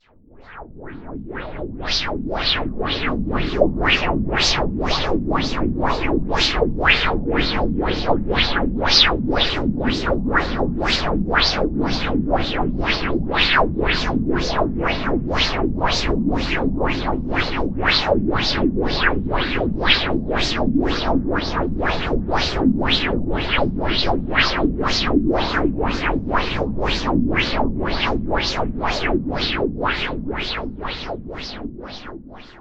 Noise Cache 3
Another weird sound made by "wah wah-ing" and echoing Audacity's noise choices.
weird, psychic, bass, noise, water, space